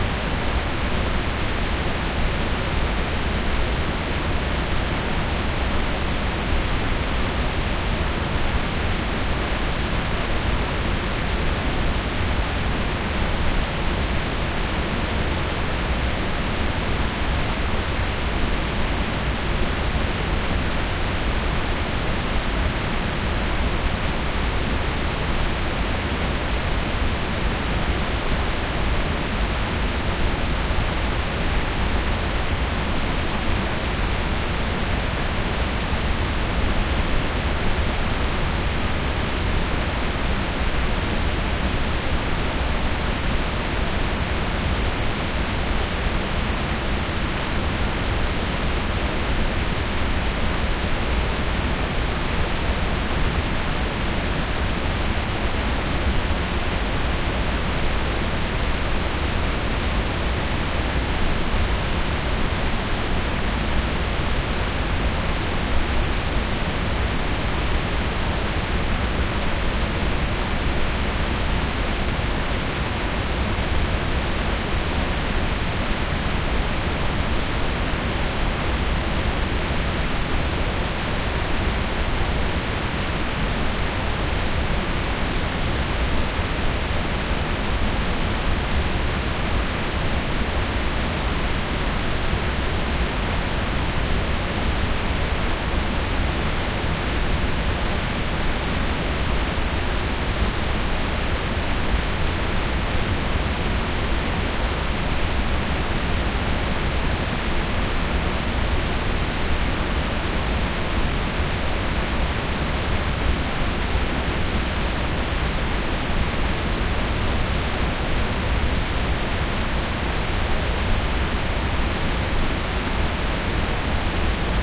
Robert Burns: The Birks of Aberfeldy.
Chorus.-Bonie lassie, will ye go,
Will ye go, will ye go,
Bonie lassie, will ye go
To the birks of Aberfeldy!
Now Simmer blinks on flowery braes,
And o'er the crystal streamlets plays;
Come let us spend the lightsome days,
In the birks of Aberfeldy.
Bonie lassie, &c.
While o'er their heads the hazels hing,
The little birdies blythely sing,
Or lightly flit on wanton wing,
In the birks of Aberfeldy.
Bonie lassie, &c.
The braes ascend like lofty wa's,
The foaming stream deep-roaring fa's,
O'erhung wi' fragrant spreading shaws-
The birks of Aberfeldy.
Bonie lassie, &c.
The hoary cliffs are crown'd wi' flowers,
White o'er the linns the burnie pours,
And rising, weets wi' misty showers
The birks of Aberfeldy.
Bonie lassie, &c.
Let Fortune's gifts at randoe flee,
They ne'er shall draw a wish frae me;
Supremely blest wi' love and thee,
In the birks of Aberfeldy.
Bonie lassie, &c.
birks-of-aberfeldy, field-recording, waterfall, scotland